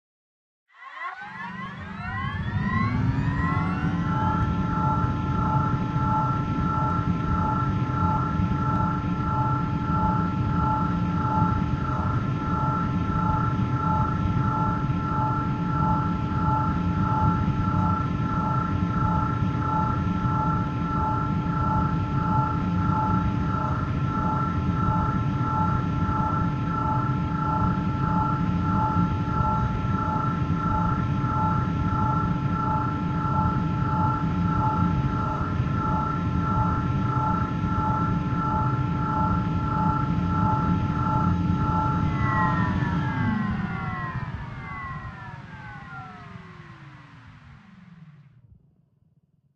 Hover engine
Sound of an anti-gravity hover device starting up, humming for a short while and shutting down again.
Created as an experiment for a short film.
Component sounds: A spinning hard drive, a vacuum cleaner and a fridge.
anti, anti-grav, anti-gravity, engine, fi, fiction, float, future, futuristic, gravity, hover, sci, science, science-fiction, sci-fi, scifi, space